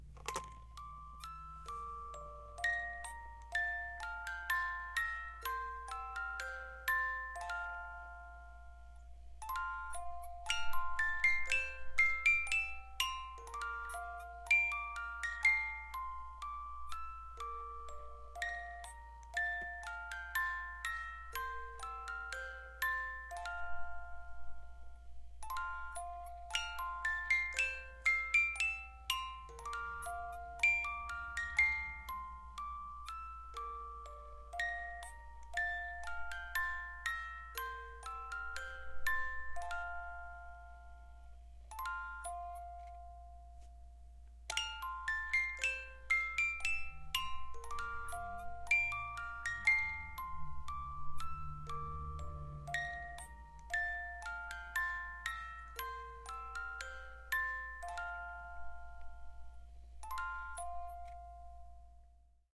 soft melody of a children musical box, wind down, metallic sound, recorded with microtrack stereo T-microphone
box, toy